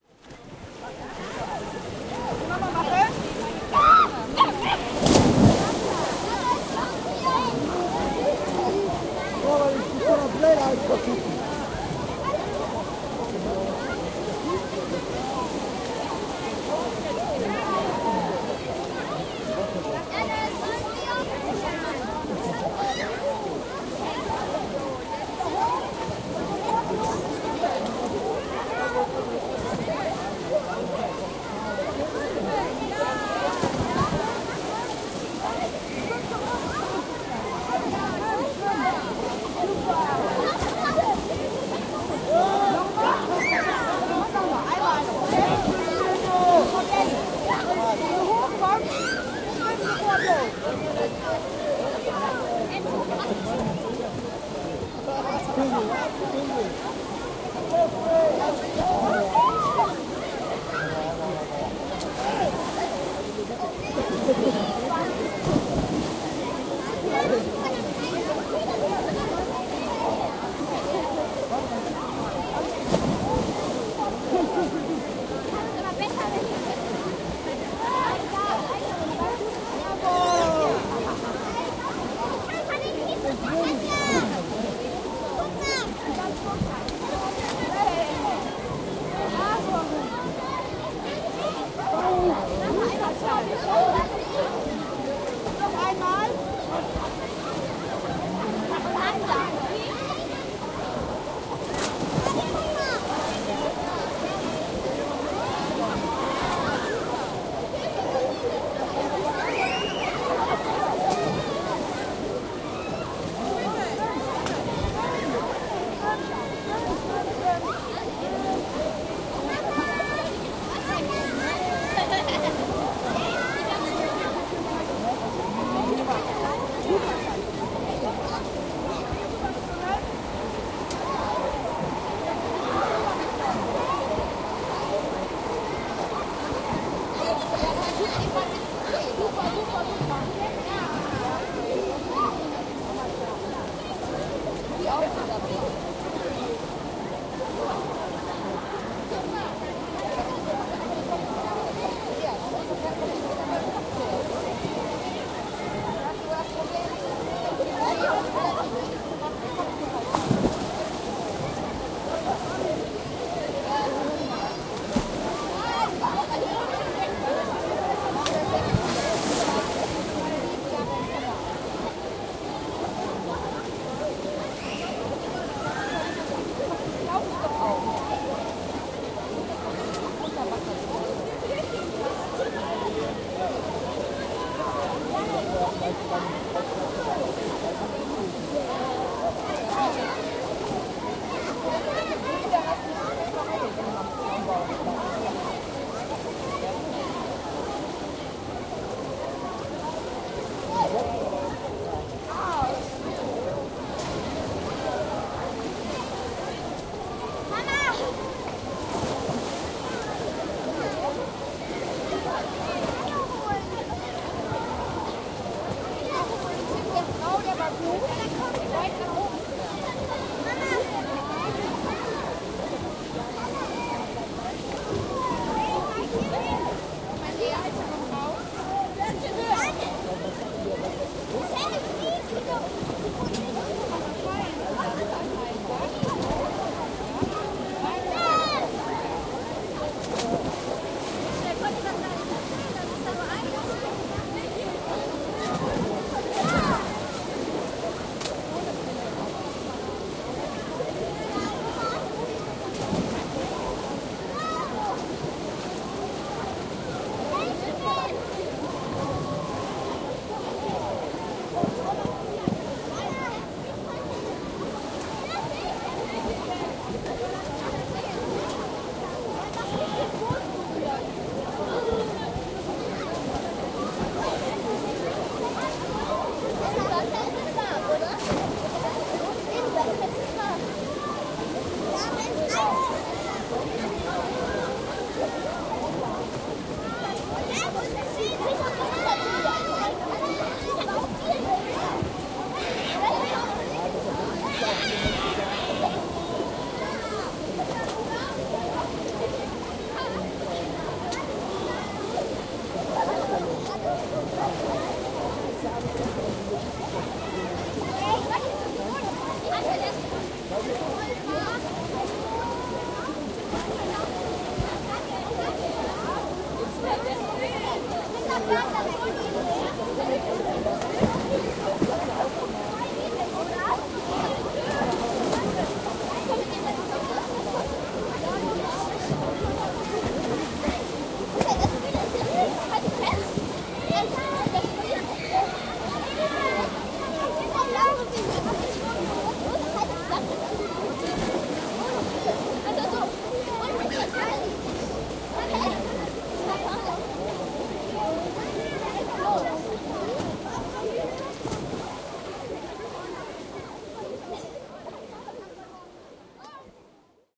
Open Air Swimming-Pool Ambience
Ambience of a Public Swimming Pool, recorded Summer 2012.